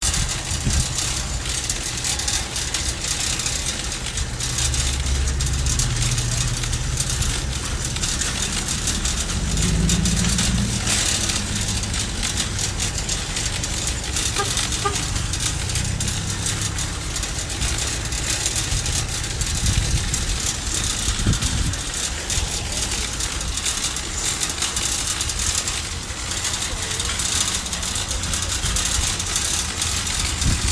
Pushing Grocery Cart
The grocery cart is rolling over the bumpy floor cement thing in front of the store.